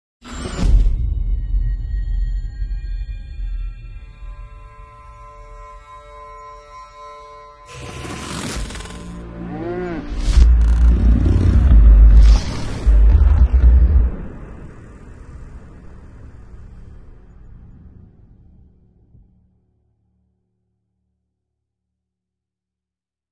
motion picture INTRO
great for horror and dark picture